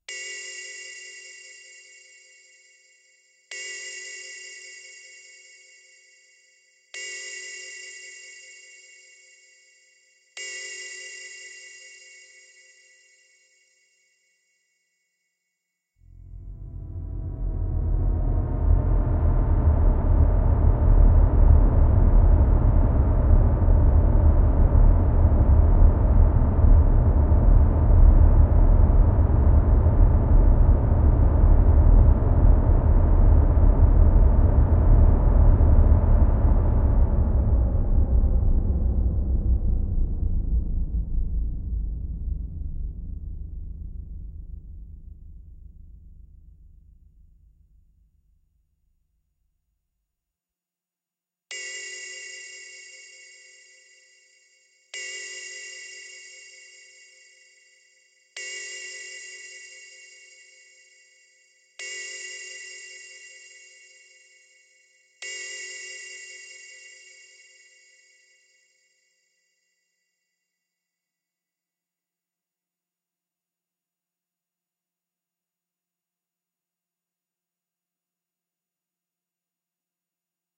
spooky descending synth with some bells